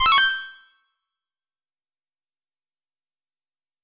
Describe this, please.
Menu Interface - Confirm 004
This was made to emulate the classic sounds of SNES era video games.
Game, Jingle, Synth, Video, Video-Game